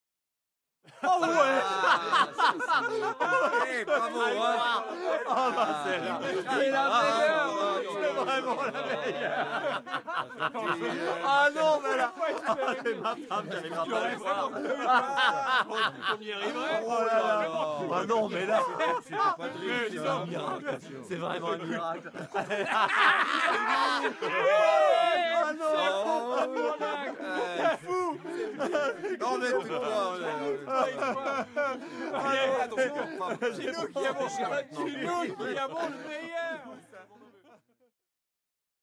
WALLA loud group cries of approval and joy
Group cries of approval and happiness. (unused material from a studio French dubbing session)